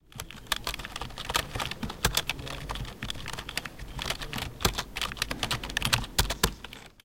Since the sound of the keyboard is really characteristic from floor 0 of the upf poblenou library, that is what we recorded. The recording was made while someone was typing and the microphone of the Edirol R-09 HR portable recorder was placed near the source.
UPF-CS14, crai, campus-upf, library, upf, typing, computer, keyboard